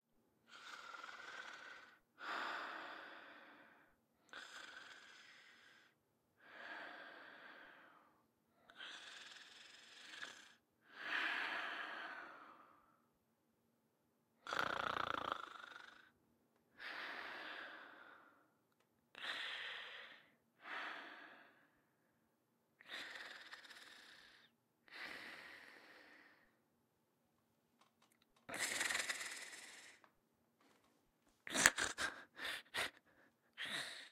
Man Snoring
Man sleeping loudly.
man, snoring, mouth, sleeping, human, male